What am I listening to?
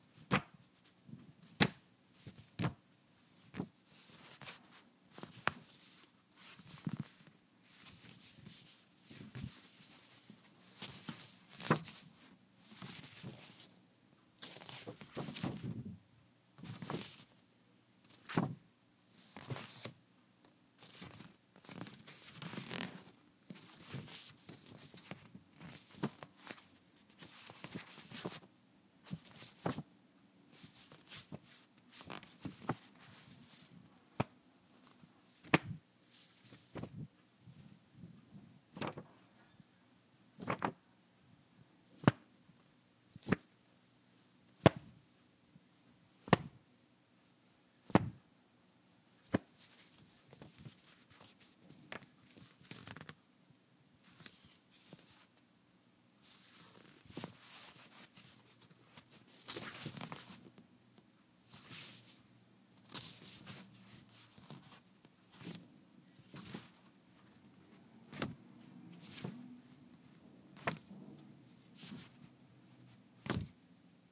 Greeting Card Foley
Foley / handling sounds of a folded manila envelope
birthday, card, cardboard, close, fold, foley, greeting, hallmark, handle, open, paper